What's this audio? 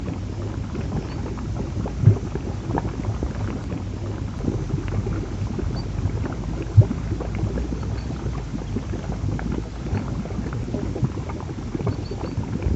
A hot bubbling mud pool in New Zealand (Thermal Wonderland, New Zealand).
New, Zealand, bubble, bubbling, geothermal, hot, lava, mud, pool, thermal